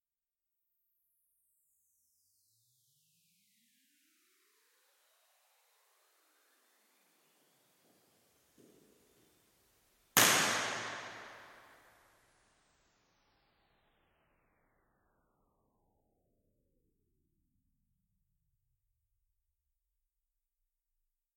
Impulsional Response Tànger Building Hall
Impulsional response from Tànger underground hall that connects Roc Boronat building with Tànger building. Recorded with Behringuer ECM800 and M-audio soundcard.